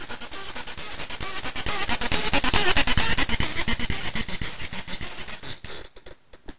fpphone-rollpast
Toy pull-along phone rolling towards, then past the microphone, making a strange mechanical squawking noise.
mechanical, toy